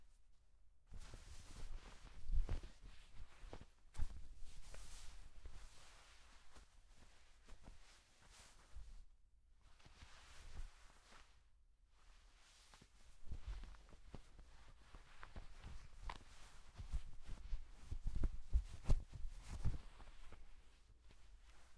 clothes rustle
Foley recorded with a Neumann M149 and Sennheiser ME66